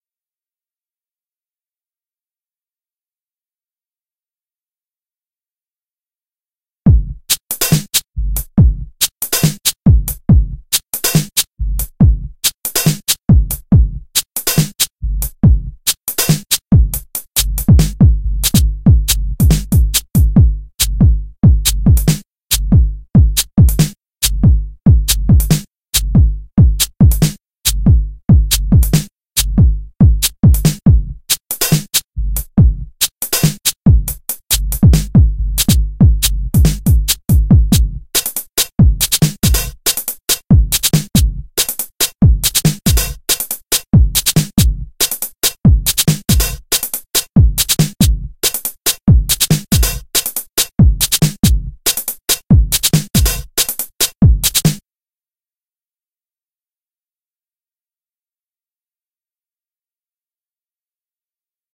alger-drums
full drums track of El Salpôv's Alger track
track, full, alger, drums